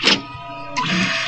Mechanical sound of a Kodak printer.